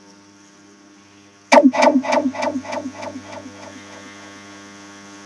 An experimental sound I did while messing with Audacity. Just like I did in that end sound, I just used my table to do the sound, but instead of pounding, I tapped it (man, my table is kinda useful for SFX. XD)
And after that, I added the vocoder, some reverb, and the echo effect then viola! This effect was born!